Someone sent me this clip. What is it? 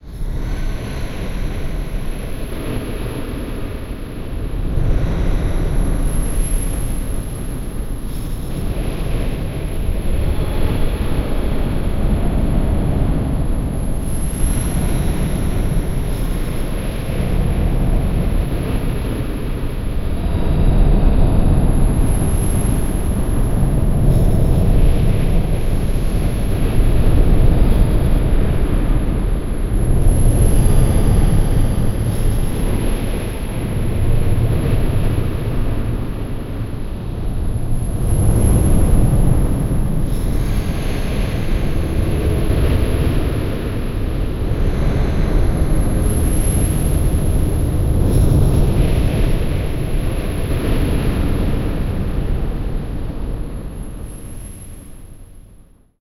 Ghosts in the Wind
Made with background in mind for horror oriented media.
Ambient, Atmosphere, Background, Cinematic, Dark, Drone, Film, Ghosts, Horror, Movie, Wind